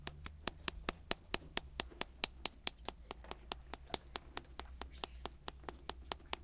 Here are the sounds recorded from various objects.
france, lapoterie, mysounds, rennes